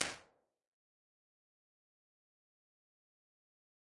IR ST Room 01
A digitally modelled impulse response of a location. I use these impulse responses for sound implementation in games, but some of these work great on musical sources as well.
acoustics, IR, echo, reverb, impulse, space